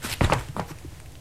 me jumping in front of the microphone inside my silent room.